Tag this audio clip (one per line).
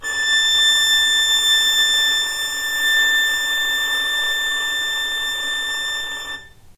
violin
arco
non
vibrato